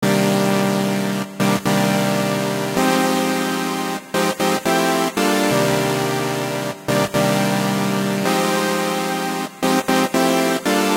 Massive Synth
A synth loop made in FL Studio.
I've actually used this same sound for a couple songs. Enjoy!
instruments, jazzy, massive, native, synth